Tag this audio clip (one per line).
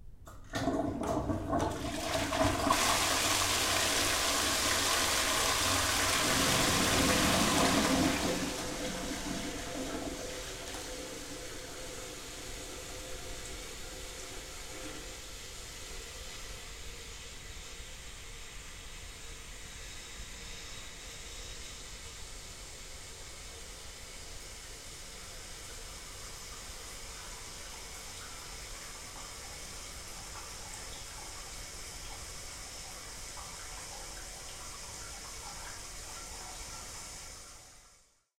bath
bathroom
Flush
Toilet
Water
WC